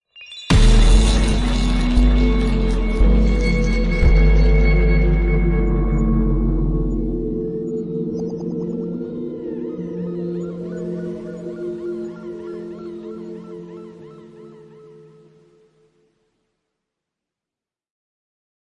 A bizarre daydream on a beach.
Created using sampling, modular synthesis, and wavetable synthesis.
composite, impact, melodic, soundscape